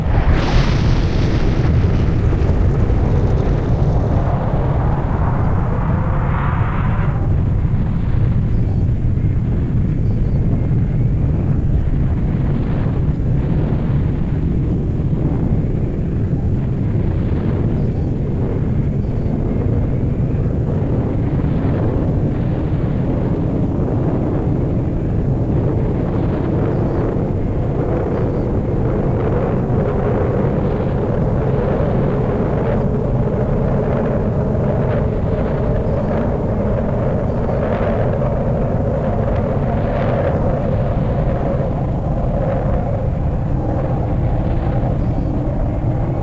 ROCKET START UP BASS
It starts off with the rocket "Liftoff" and turns into a deep rocket engine sound
ambiance, bass, boom, explode, launch, missle, rocket, up